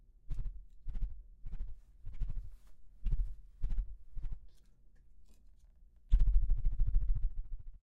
Sonido de una polilla aleteando
Sound of a moth fluttering
aleteo, buzzing, fluttering, insect, insecto, moth, polilla, zumbido